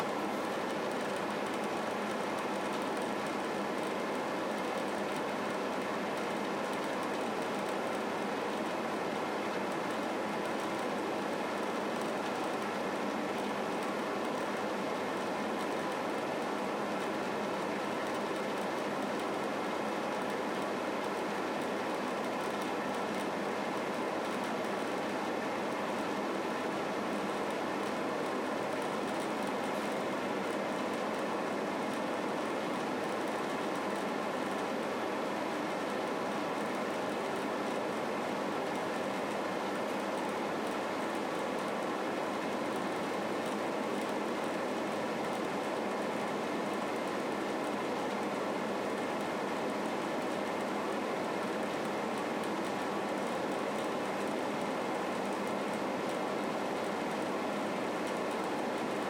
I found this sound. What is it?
Fan Noisy Air
A noisy fan of a cooler machine.
Fan, Noise, Vent